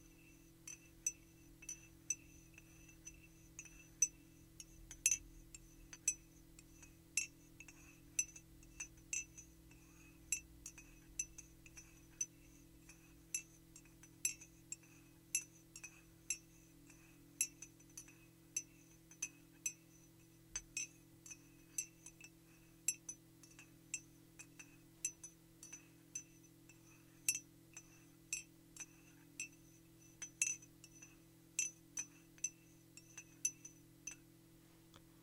tea stir
Teaspoon stirring in liquid.
cup, foley, spoon, tea